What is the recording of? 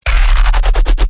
Bass 03 - Spinning
Harmor bass made via granulizing in FL12.